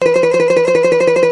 Wiggly sound, spider on elastic. Little jiggle, toes wiggling, cartoon style.
Created using GarageBand's Internal synth. Apple iMac. 8.10.2017